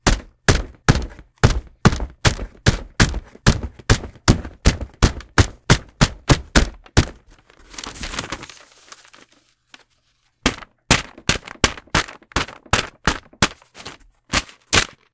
Paper-covered Cardboard Impacts
Recording of me punching paper taped to a cardboard box full of VHS tapes in plastic shells, using a TASCAM DR-05X. Silences between hits removed in Audacity, used as sweetener for piñata impacts
You're welcome to share links to your work featuring this sound in the comment section.
While I appreciate and encourage you to credit this account in your work, it's not necessary.
cardboard, foley, hit, impact, paper, pinata, punch, thud